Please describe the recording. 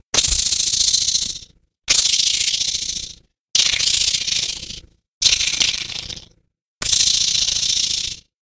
Angry spider monster
Sounds made for a monster in Shrine II, an upcoming lovecraftian themed FPS game.
monster, scary, spider